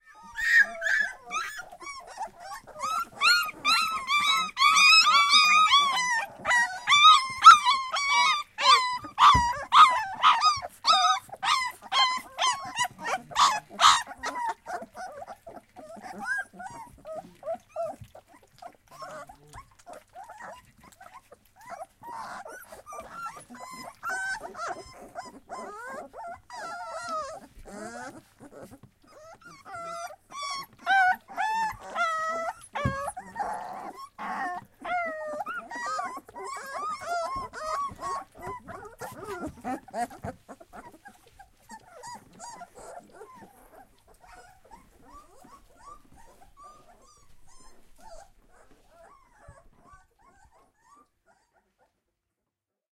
young leonbergs
young dog (leonbergs) just born (H4n)
bark, young